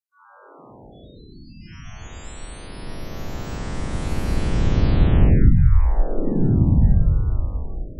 [AudioPaint] physics note
Created with AudioPaint from old photo of my physics notebook and a pen, and a shadow of the pen on the note in an almost dark room.
audiopaint, image-to-sound